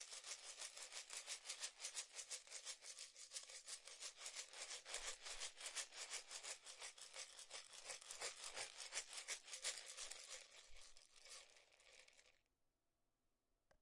Panned shaker 2
Shaker recorded in stereo.
panning, percussion, rhythm, shaker